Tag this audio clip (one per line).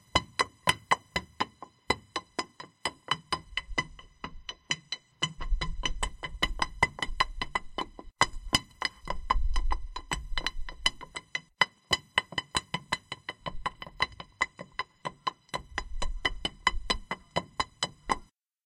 creepy
spooky
strings